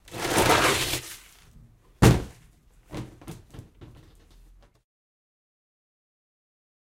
jf Garbage Can
Garbage can sliding, movement.
garbage, garbage-can, sliding